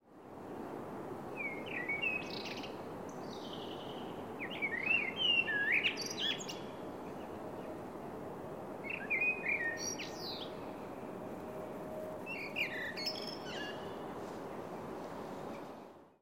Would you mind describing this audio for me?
Bird singing in a city park of the Hague at dawn. Recorded with a zoom H4n using a Sony ECM-678/9X Shotgun Microphone.
Dawn 09-03-2015